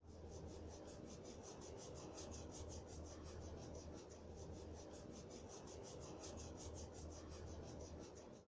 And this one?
Sonido realizado para el final de la materia Audio 1, creado con foley, editado con reaper y grabado con Lg Magna c90
HouseSounds, Audio1, Reaper